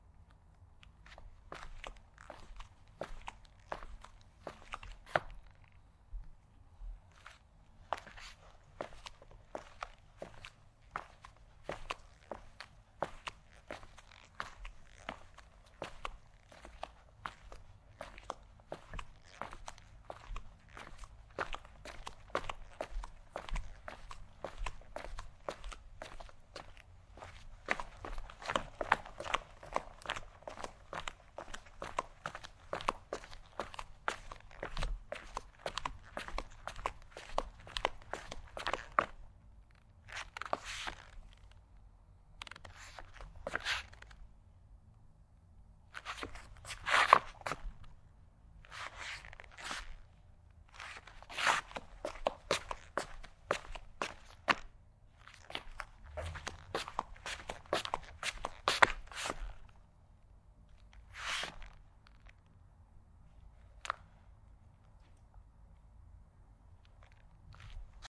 Sandal Walking
Walking on a concrete floor in the studio wearing sandals. Turns and quicker pace too.
Footsteps, Foley, Steps, Walk, Sandal, Sandals, Walking